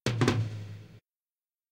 140 bpm drum fill loop